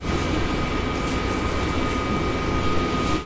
Crane, Noises 03
An active crane that carries heavy objects, workers are pushing buttons and making all kinds of sounds and noises.
This sound can for example be used in real-time strategy games, for example when the player is clicking on a building/construction - you name it!
/MATRIXXX
construction, work, objects, area, builders, work-field, field, fields, crane, object, workers